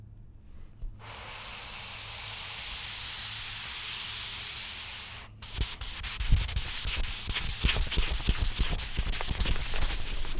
Dry Erase Fast
An eraser sliding across the chalk tray.
chalk-tray, eraser